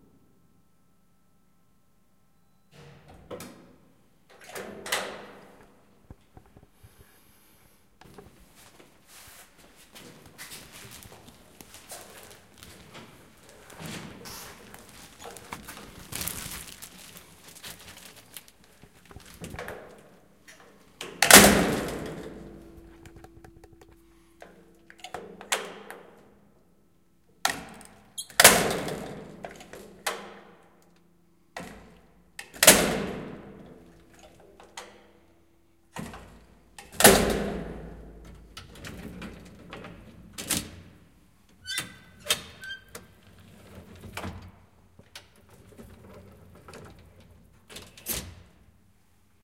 old elevator door open close
An old elevator door closing and opening a few times. Good for cutting out bits from. Recorded with Zoom H4n, close.